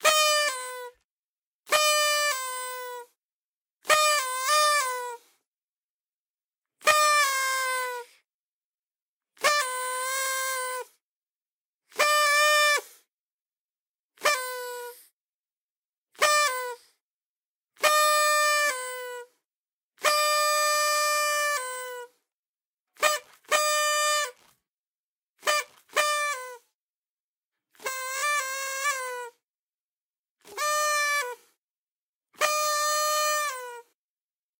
This is several takes in a row of the typical party noise maker.